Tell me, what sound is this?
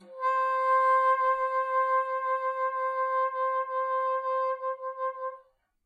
One-shot from Versilian Studios Chamber Orchestra 2: Community Edition sampling project.
Instrument family: Woodwinds
Instrument: Bassoon
Articulation: vibrato sustain
Note: C5
Midi note: 72
Midi velocity (center): 31
Microphone: 2x Rode NT1-A
Performer: P. Sauter
bassoon, c5, midi-note-72, midi-velocity-31, multisample, single-note, vibrato-sustain, vsco-2, woodwinds